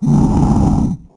dragon growls
Fantastic atmos with dragon
field-recording, fantastic